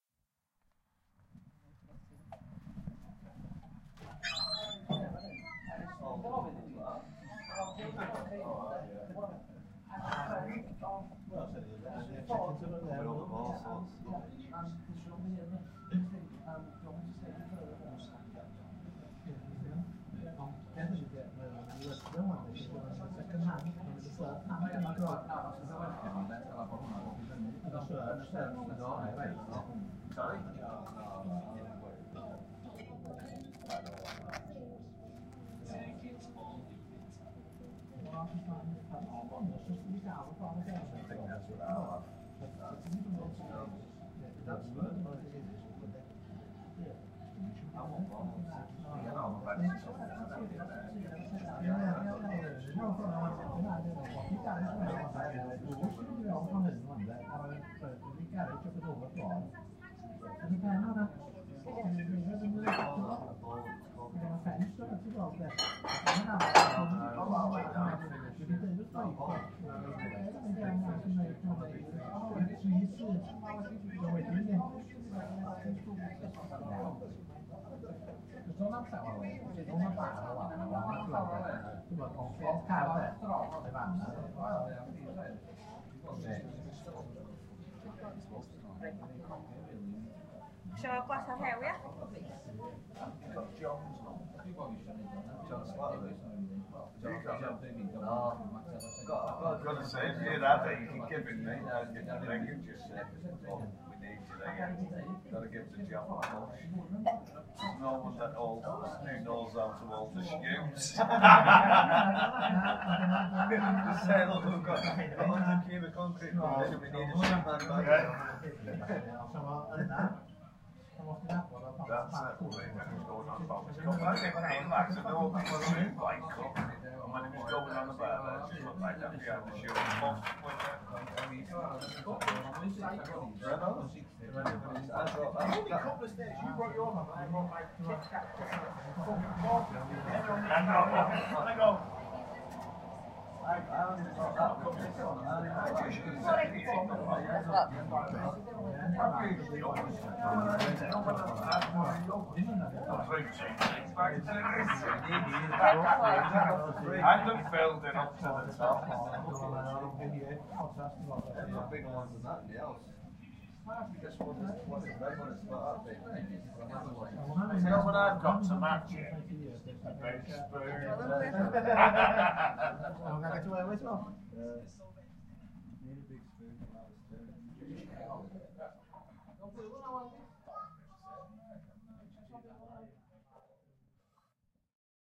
Pub Ambience
A stereo field recording of entering a pub in Wales, UK. Languages heard are Welsh and English. Zoom H2 front on-board mics.